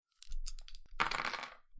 2 dice being shaken and thrown. sound has been cut down in length slightly to make it not awkwardly long.
die, dice, dice-shake